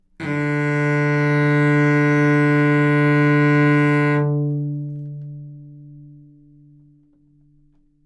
Cello - D3 - other
Part of the Good-sounds dataset of monophonic instrumental sounds.
instrument::cello
note::D
octave::3
midi note::38
good-sounds-id::380
dynamic_level::f
Recorded for experimental purposes